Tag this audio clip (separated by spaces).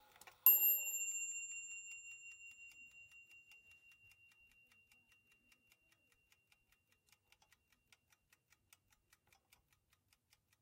carriage chimes clock